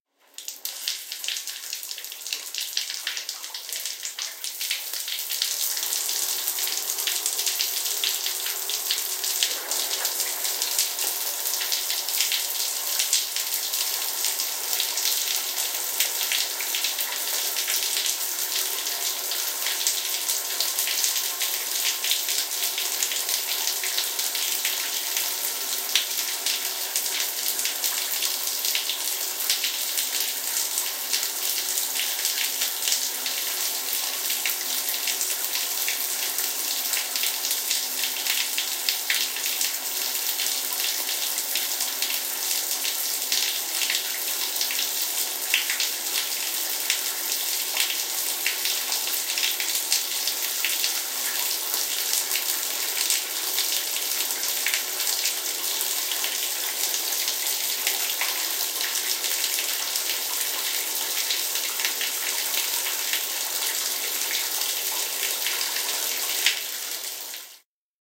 Shower sound recording
bath, drip, drizzle, deluge, bathroom, downpour, water-falling, running, faucet, shower-sound, shower, water